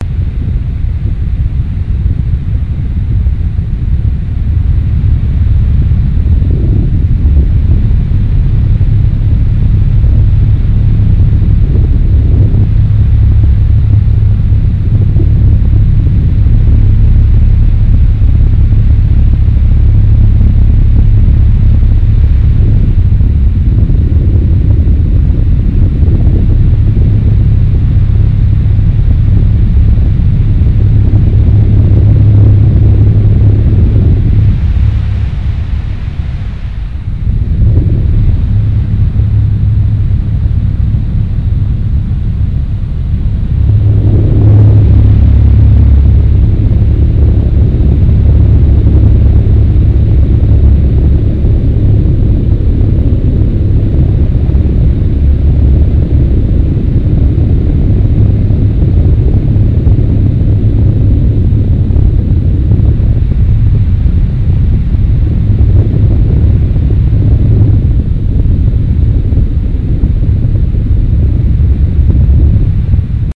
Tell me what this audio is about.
recorded with the RODE VIDEOMIC in the front ofa fan.
bass, claustrophobia, pressure